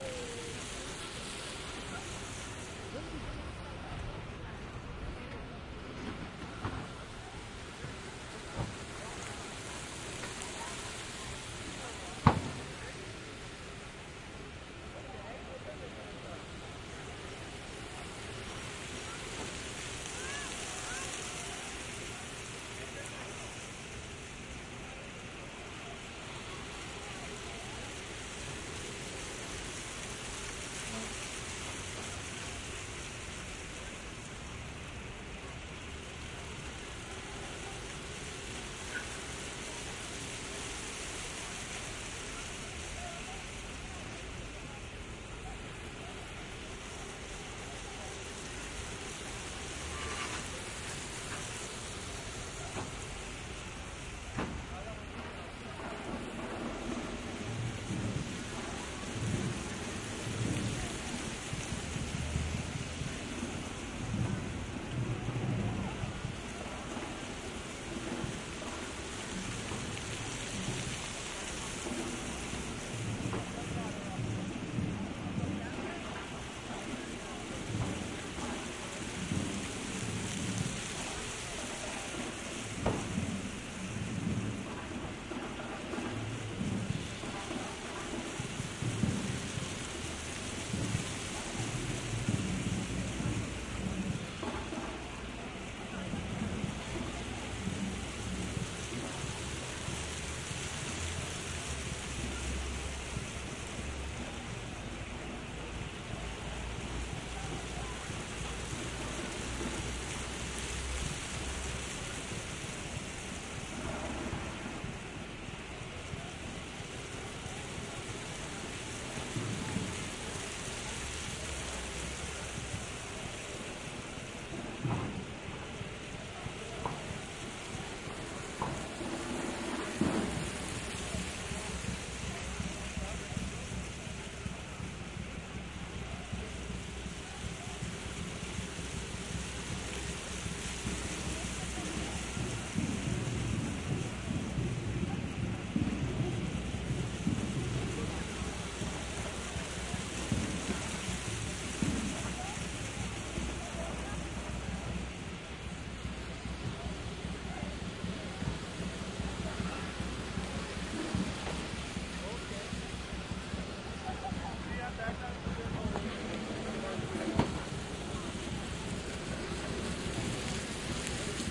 Standing in Dundas Square park in the late evening. In the forground is a fountain that comes up from the ground that grows larger and smaller. In the background there are two street performers playing drums, echoing off the buildings around the park.Recorded with Sound Professional in-ear binaural mics into Zoom H4.
binaural, canada, city, downtown, field-recording, fountain, geotagged, night, outside, people, phonography, toronto